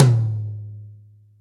Hard stick hit on Yamaha Maple Custom drum kit tom